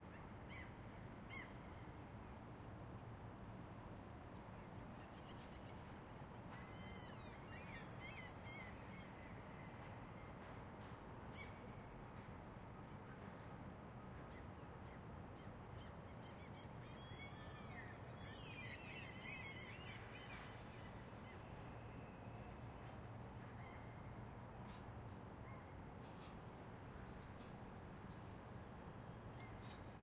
Seagulls distant
animals, seagulls, distant